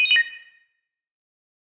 Just some more synthesised bleeps and beeps by me.